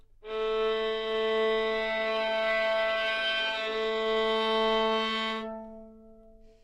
Violin - A3 - bad-timbre
Part of the Good-sounds dataset of monophonic instrumental sounds.
instrument::violin
note::A
octave::3
midi note::45
good-sounds-id::3826
Intentionally played as an example of bad-timbre
single-note, multisample, neumann-U87, good-sounds, A3, violin